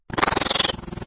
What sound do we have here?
Surge Leech 1
A leech surging with electric energy.
bug, creepy, growl, hiss, insect, leech, monster, slime, slimy